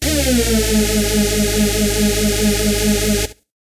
Classic rave noise as made famous in human resource's track "dominator" - commonly referred to as "hoover noises".
Sampled directly from a Roland Juno2.
hoover, juno2, musical-elements, roland, samples, synth